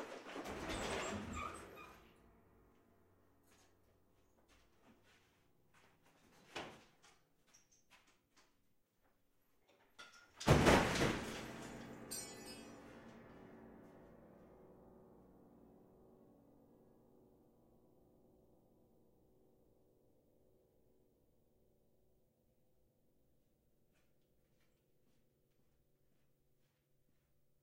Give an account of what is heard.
knock over piano2
Pushing over Piano
Pushing, Piano, over